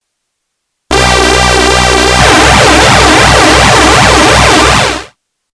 Music Horror Sci Fi Ambient Atmosphere Violence Suspence Tension Thriller Theremin
A brief Horror or Sci-Fi cue on analog synth.
electronic, synth, switched